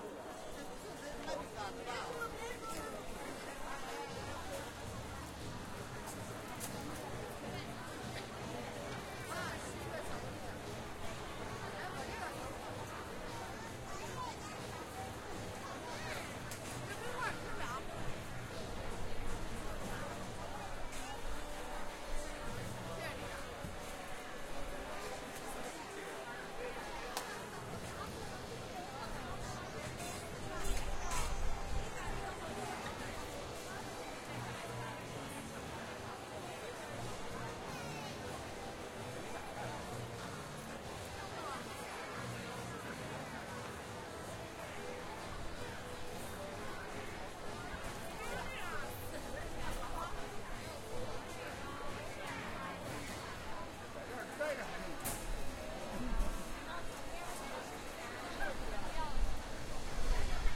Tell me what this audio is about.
HK large crowd outside
Sound of a large, calm crowd casually milling about, departing Hong Kong Disneyland. There is nothing particularly Disney about this clip, unless the passersby are mentioning it in their conversation. It is just a long ambient sound of an outdoor Hong Kong crowd.
Asia, China, crowd, Hong-Kong, outdoor